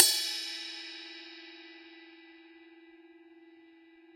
CC17-ZAMThn-Bl~v08
A 1-shot sample taken of a 17-inch diameter Zildjian Medium Thin Crash cymbal, recorded with an MXL 603 close-mic and two Peavey electret condenser microphones in an XY pair. The cymbal has a hairline crack beneath the bell region, which mostly only affects the sound when the edge is crashed at high velocities. The files are all 200,000 samples in length, and crossfade-looped with the loop range [150,000...199,999]. Just enable looping, set the sample player's sustain parameter to 0% and use the decay and/or release parameter to fade the cymbal out to taste.
Notes for samples in this pack:
Playing style:
Bl = Bell Strike
Bw = Bow Strike
Ed = Edge Strike
1-shot, cymbal, velocity